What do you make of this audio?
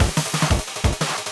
7x7tks&hesed2(45)
707, beat, bend, drum, loop, modified